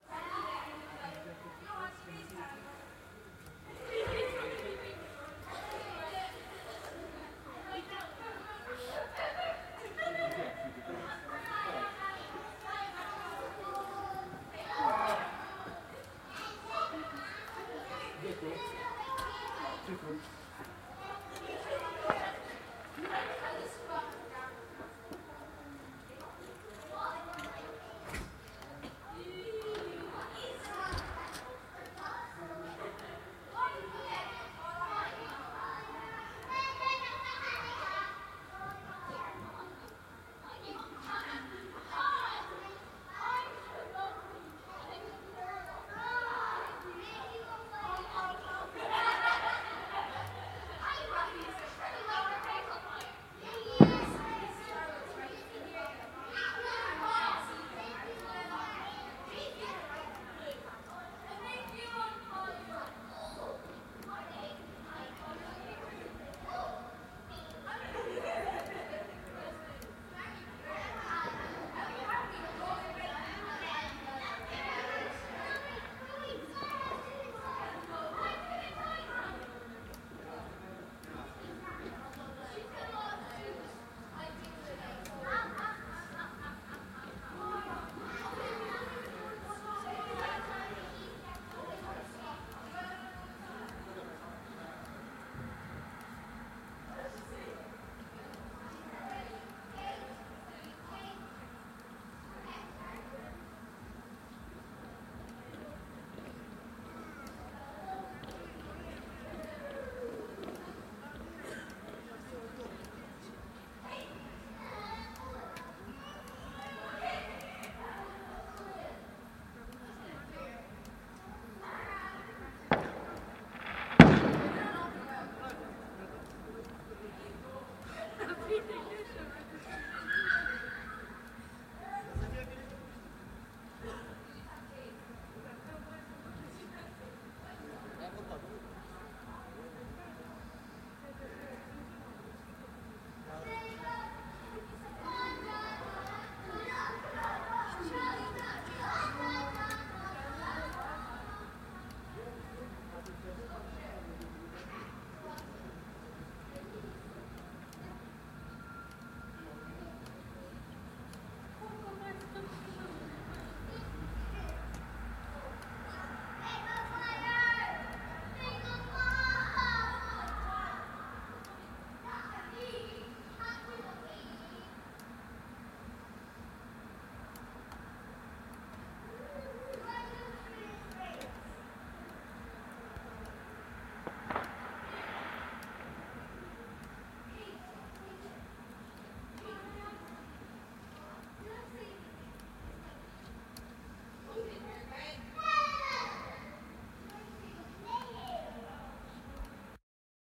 Halloween Ambience in Village
Field recording of trick or treaters in housing estate on Halloween, some fireworks in background, mostly people/children talking, very background-y.
ambient, background, children, crowd, field, firework, halloween, life, outdoors, people, real, talking, urban